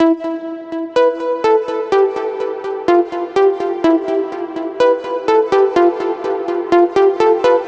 TS SYNTH 125bpm 88
Simple music loop for Hip Hop, House, Electronic music.